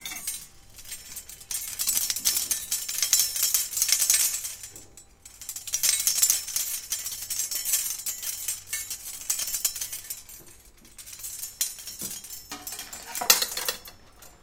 Shaking Metal Cutlery Holder
A metal thing with cutlery hanging from it. I shake it. Huzzah!
Recorded with Zoom H2. Edited with Audacity.